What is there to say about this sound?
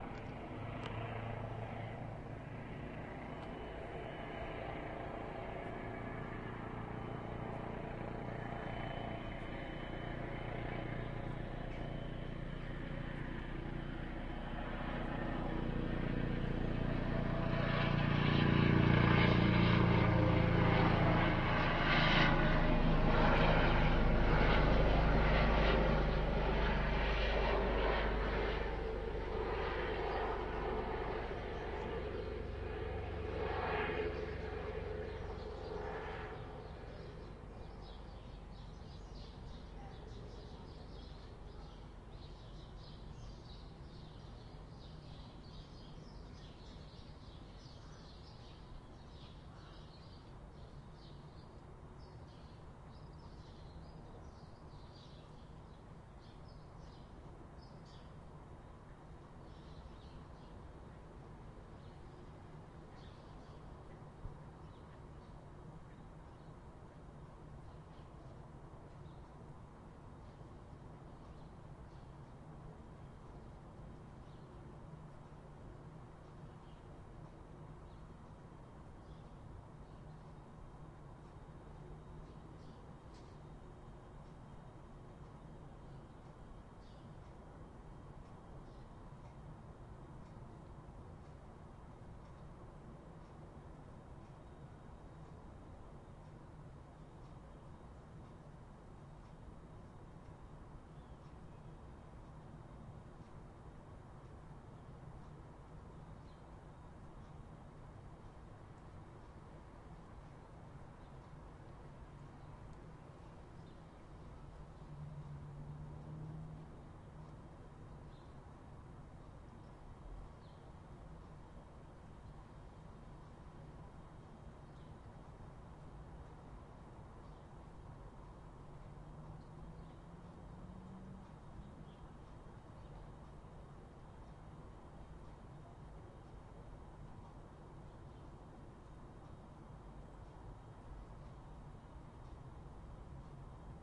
POLICE COPTER L - C THEN OFF TO PENARTH
I think this is a Eurocopter 135. Whatever, it's used by the police in Cardiff. It circles overhead then heads off.
helicopter, overhead